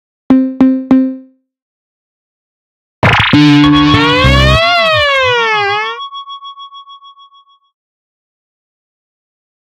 20140316 attackloop 120BPM 4 4 Analog 1 Kit ConstructionKit WeirdEffectsAmped2
This loop is an element form the mixdown sample proposals 20140316_attackloop_120BPM_4/4_Analog_1_Kit_ConstructionKit_mixdown1 and 20140316_attackloop_120BPM_4/4_Analog_1_Kit_ConstructionKit_mixdown2. It is a weird electronid effects loog which was created with the Waldorf Attack VST Drum Synth. The kit used was Analog 1 Kit and the loop was created using Cubase 7.5. Various processing tools were used to create some variations as walle as mastering using iZotope Ozone 5.
120BPM, ConstructionKit, dance, electro, electronic, loop, rhythmic, sci-fi, weird